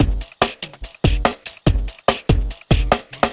Soundstudio, 140 bpm songs
140, Blutonium, Boy, bpm, Hardbass, Hardstyle, songs